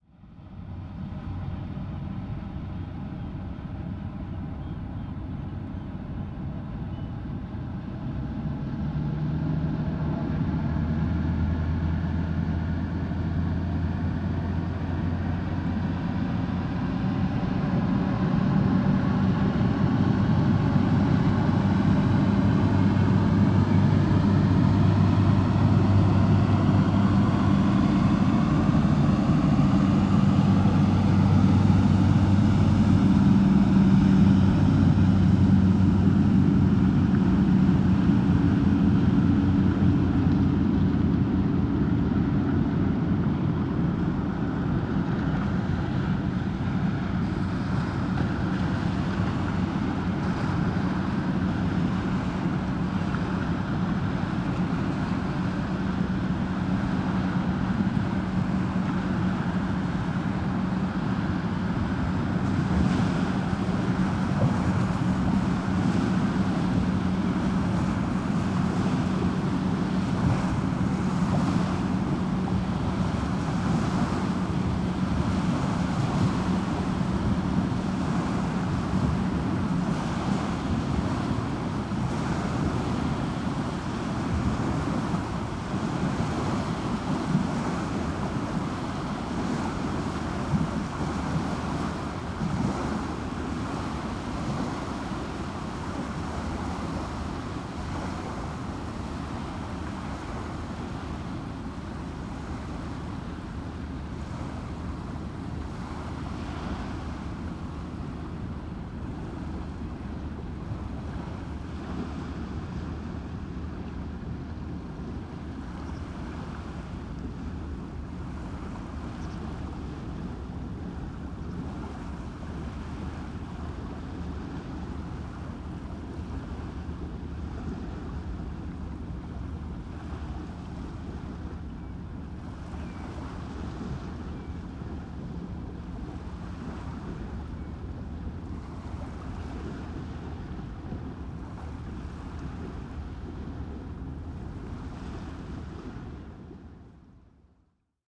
A boat accelerating out of the Marina with following waves hitting the embankment. Microphone positioned on an embankment in Hamford Water Nature Reserve, Essex, Uk. Recorded with a Zoom H6 MSH-6 stereo mic in winter (January)